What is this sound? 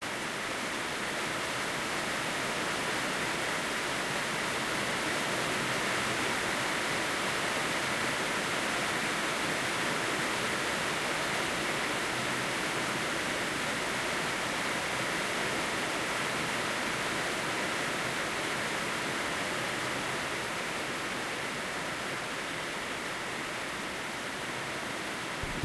A Hard Rain's a-Gonna Fall